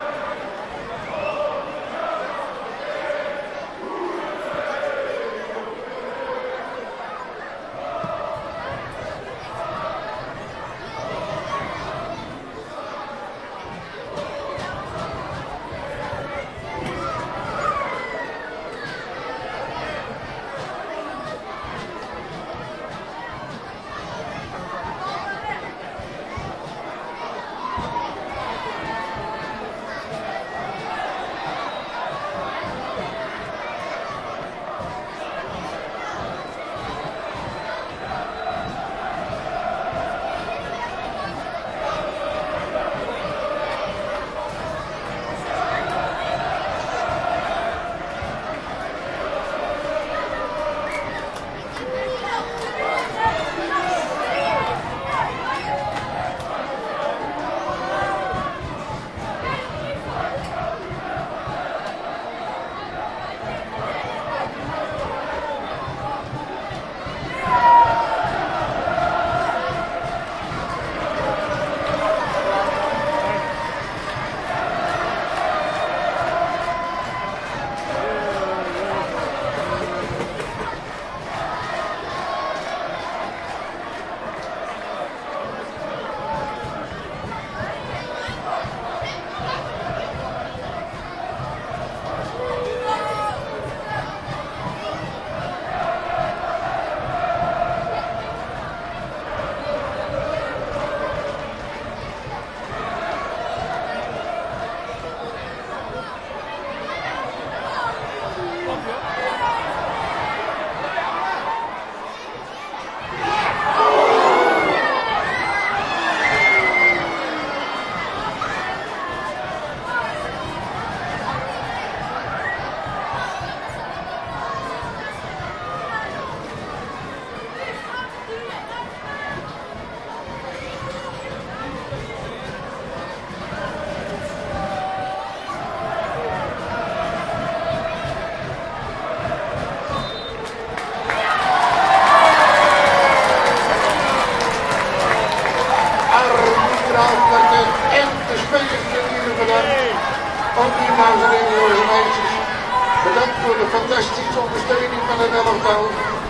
Soccer Stadium 10
Field recording of a Dutch soccer match at the Cambuur Stadium in Leeuwarden Netherlands.
soccer, crowd, public, Field-recording, match, stadium, soccermatch, footballmatch, football